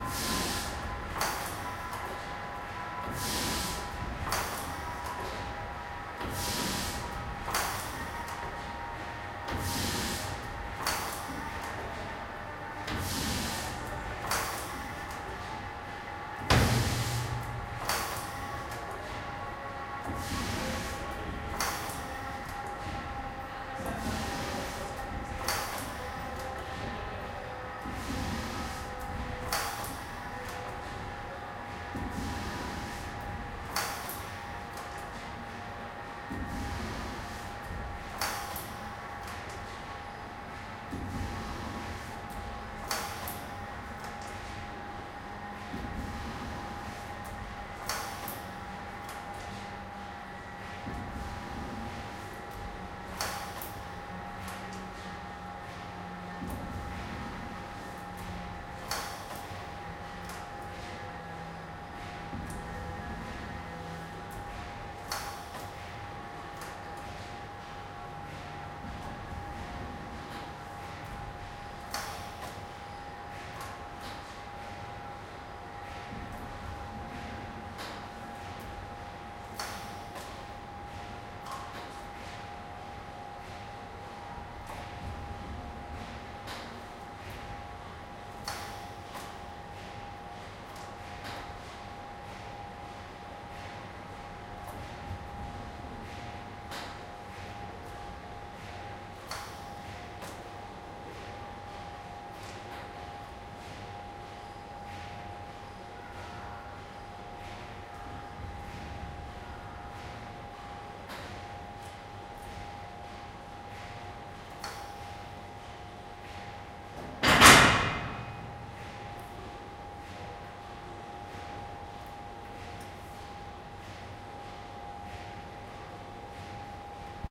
The Crossley Gas Engine turning down
Sound from the Crossley Gas Engine while turning down. The engine is located at the Kelham Island Museum in Sheffield. Recorded on May 27, 2018, with a Zoom H1 Handy Recorder.
industrial, engine, gas